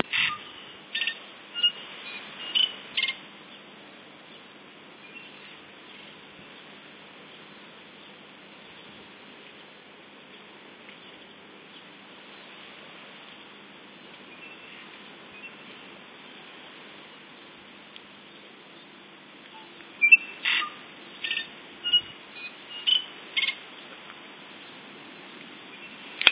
birdsong in new zealand dated 05/12/2005.
birdsong, new, tui, zealand